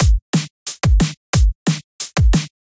DNB kicks
kick, DNB, bass, drum